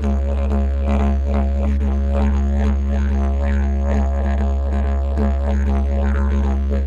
didge dowiduduwi loop
Rhythmic recording, Didgeridu (tuned in C). Useful for world music or trance mixes. Recorded with Zoom H2n and external Sennheiser Mic.
loop, world-music, rhythm